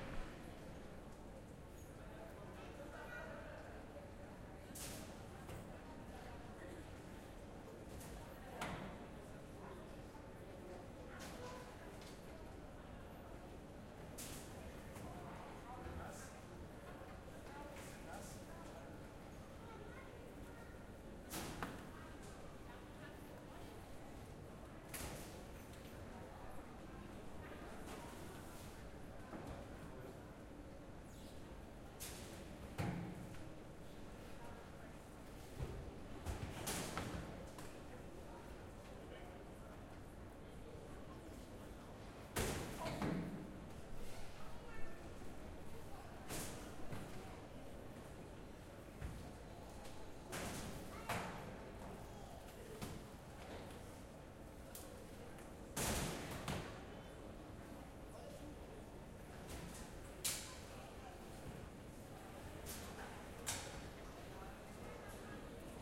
Ambience INT airport baggage claim conveyor band people chattering (lisbon portugal)
Field Recording done with my Zoom H4n with its internal mics.
Created in 2017.
airport, Ambience, baggage, band, chattering, claim, conveyor, INT, lisbon, people, portugal